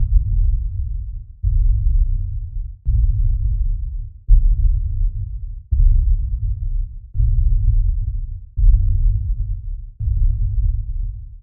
The sound of a giant walking and rumbling the ground. Very short fades at beginning and end make this suitable for continuous looping. Created in Audacity from "Cinematic Deep Rumble" by swiftoid
I'd love to see what you're making.